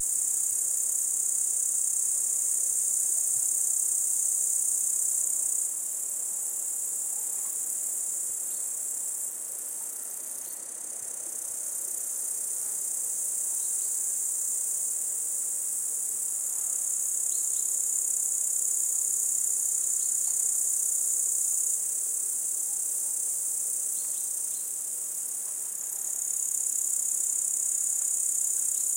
Grasshoppers, and various insects. Summer, meadow, sun
meadow, grasshoppers, insects, sun, summer